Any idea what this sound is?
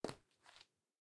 Wood Floor Classic Shoe Footstep 1 2
Barefoot, Boot, Design, Feet, Floor, Foley, Footstep, Ground, Hard, Loud, Movement, Moving, Real, Recording, Running, Shoe, Sneakers, Soft, Sound, Step, Stepping, Sticky, Walking, Wood, Wooden